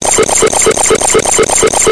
1stPack=NG#3
"lightswitch bubble." }loop-able{
deconstruction, glitch, lo-fi, loud, noise